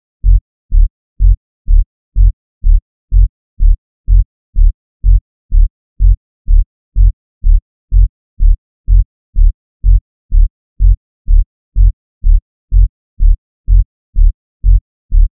experimental techno sounds,production
techno, loop, produccion, bass, sintetizador